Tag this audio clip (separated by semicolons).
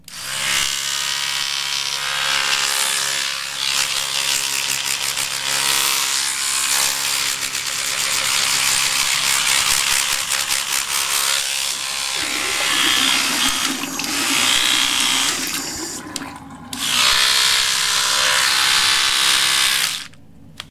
brush; brushes; brushing; clean; cleaning; electric; electrical; electrical-engine; electromotor; electronic; teeth; tooth; tooth-brush; toothbrush; trilling; vibrating; vibration; vibrations; vibrator; water